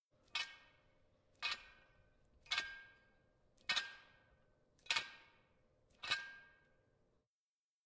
Lifting Zvedani cinky 2
Lifting the barbell.